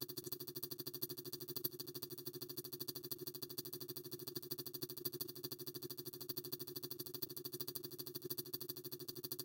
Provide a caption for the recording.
Quantum particles 02
A synthetic sound I created for representing some quantum computing. Of course it's just a creative, not scientific approach.
abstract, calculate, calculating, compute, effect, future, sci-fi, sfx, sounddesign, soundeffect, strange